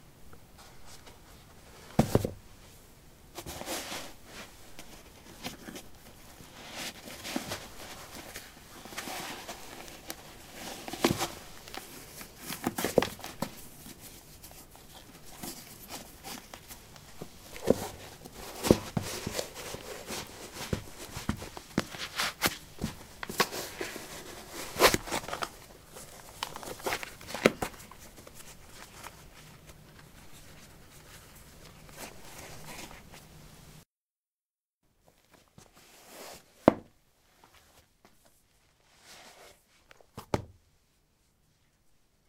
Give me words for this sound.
soil 12d squeakysportshoes onoff
Taking squeaky sport shoes on/off on soil. Recorded with a ZOOM H2 in a basement of a house: a wooden container placed on a carpet filled with soil. Normalized with Audacity.
step, footstep